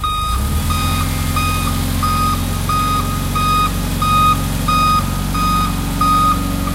Forklift Engine Reversing
Industrial, motor, engine, Buzz, Factory, machine